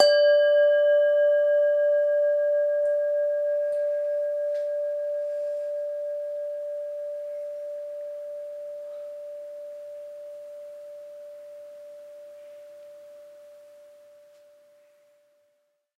mono bell 0 D 16sec
Semi tuned bell tones. All tones are derived from one bell.
bell-tone, bell, bong, dong, ding, ping, bell-set, bells